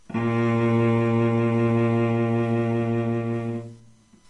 11 cello A#2 Bb2
A real cello playing the note, A#2 or Bb2 (2nd octave on a keyboard). Eleventh note in a chromatic C scale. All notes in the scale are available in this pack. Notes, played by a real cello, can be used in editing software to make your own music.
A-sharp, Bb, B-flat, cello, note, scale, string, stringed-instrument, string-instrument, violoncello